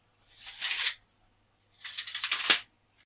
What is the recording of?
Mono recording of a sword or knife being drawn and the sheathed in a scabbard. Recorded with a Peavey i100 dynamic microphone using a Peavey PV10 USB mixing desk. Noise removal performed in Audacity.